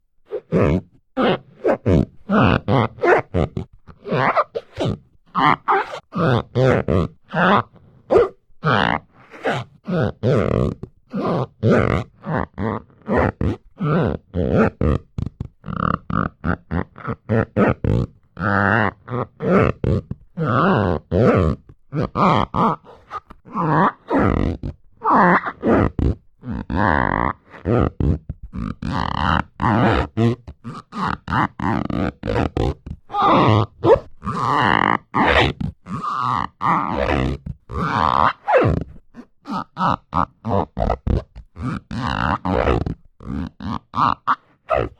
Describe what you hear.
Rubber Squeak Type 07 192 Mono
Using a wet rubber sandal to produce a range of different rubber squeaks. Intended for foley but possibly useful for more abstract sound design & creatures.
comical, creak, formant, rubber, squeak, squeaking, squeaky, vowel, wet